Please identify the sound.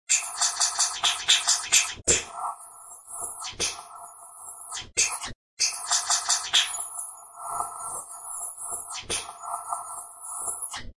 Active Breaths 1.1
Multiple processed breath sounds. Rhythmic. Impact hits. Very active.